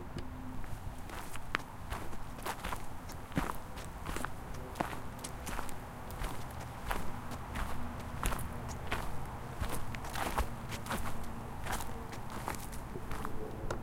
walking on wood chips
walking on wood-chips